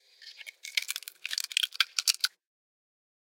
SFX wood cracking
SFX, wood, crack, destroy, burst, break, destroy
burst, crack, break, wood, SFX, destroy